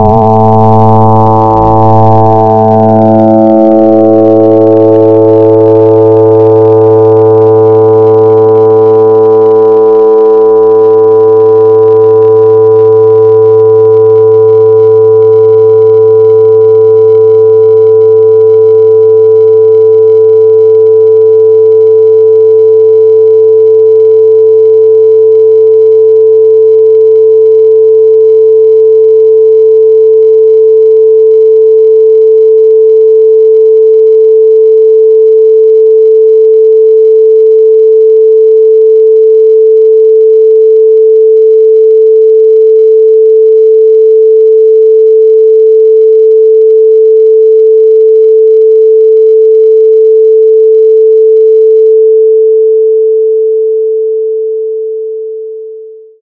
from A 110hz sound, to more simple 440hz sine sound.
made from 2 sine oscillator frequency modulating each other and some variable controls.
programmed in ChucK programming language.